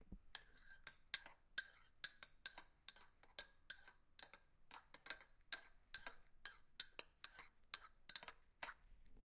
MOVEMisc stirring water in cup TAS H6
Recorded with a Zoom H6 and Stereo Capsule. Stirring a cup of water with a metal teaspoon.